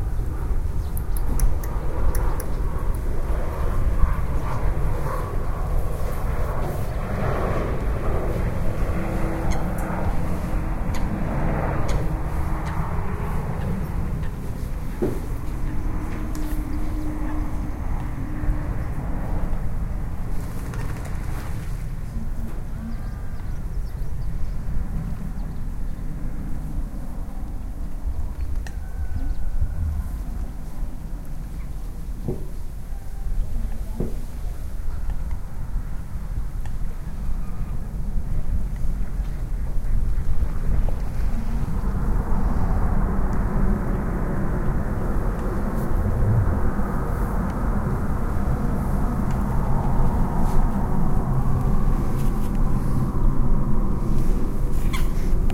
We record the sounds of some greenneck ducks, coots and other water birds in the "Aigüat". We could hear the airplanes and the roosters of our village.